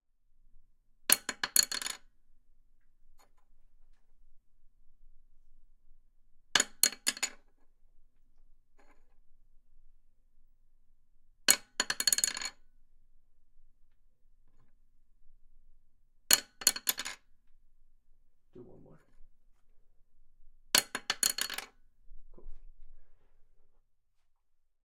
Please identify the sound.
Pencil Drop
Dropping a pencil onto a wooden desk from different heights.
crash, desk, Drop, pencil, smack, wood